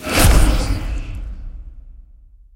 Spell shoot
Heavily relying on granular synthesis and convolution
magic, broken, impact, shot, spell, shoot, destoryed